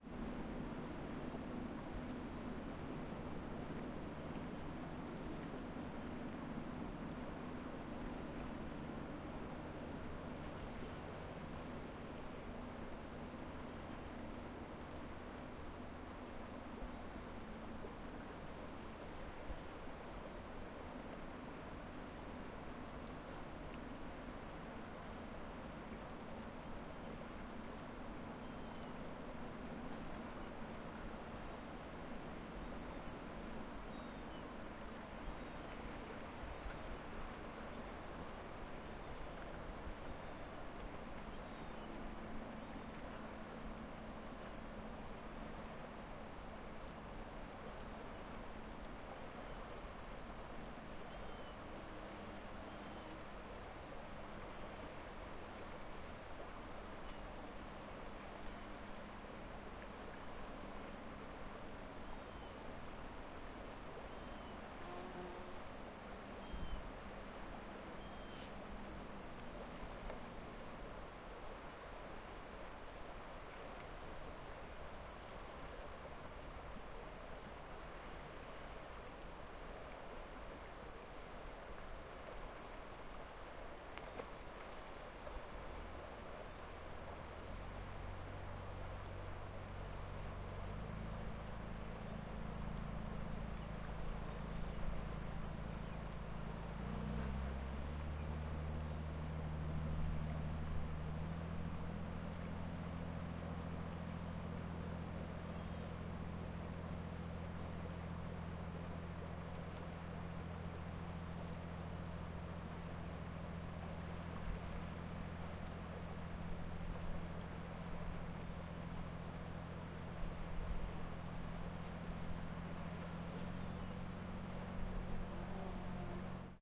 Ambi - Sea noise from steap stony hill 1of2 - Sony pcm d50 stereo Recording - 2010 08 Exmoor Forrest England
Ambi, sea, stereo, forrest, waves, coast, cliffs, exmoor, ambiance